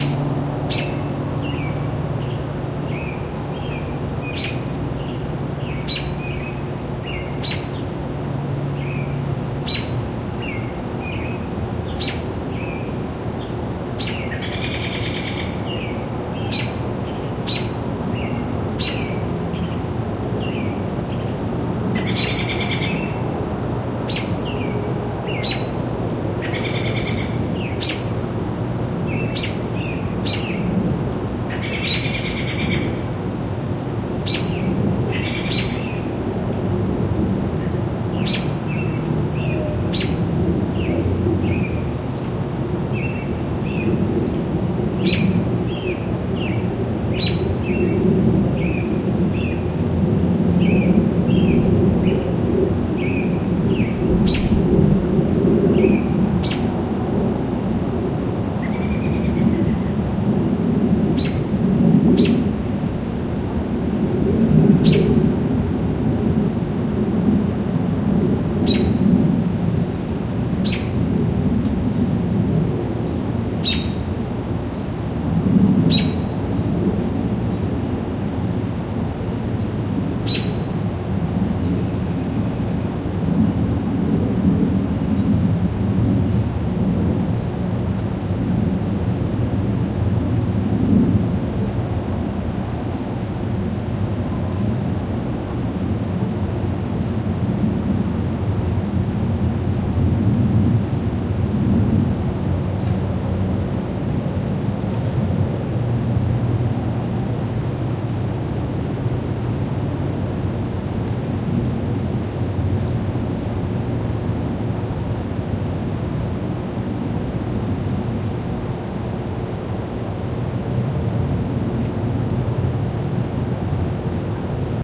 outside amb with figure of 8
recorded on back porch birds ambience etc...
signal chain is: Pyle pdmic45 condenser sent to left channel of an art usb dual pre mic preamp... right channel Nady rsm4 plus 20 db selected...
both channels sent to a Zoom H1... Post done in reaper.
Phase flip and panned figure of 8 to sides and left condenser mono for mid side
ribbon-mic, Nady-rsm4, suburb, birds, ambience, s, field-recording, mid-side, outside, nature, m